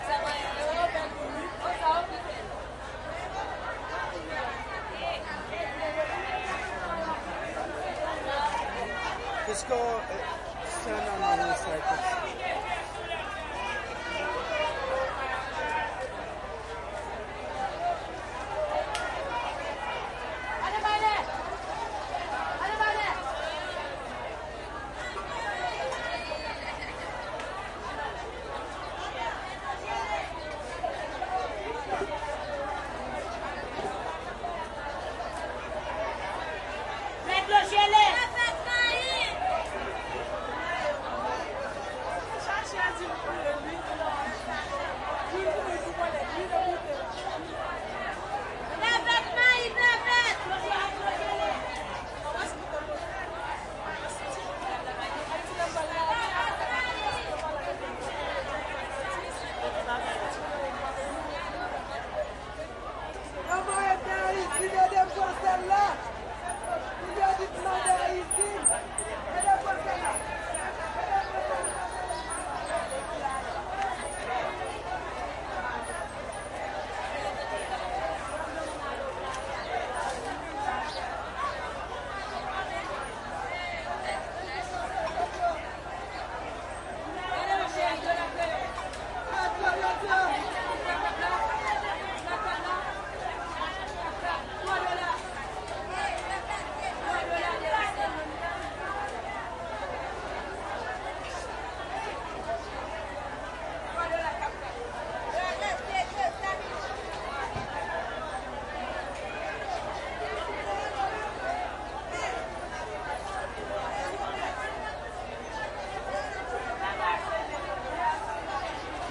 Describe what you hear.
market ext busy Haiti1
market ext busy Haiti
Haiti, busy, market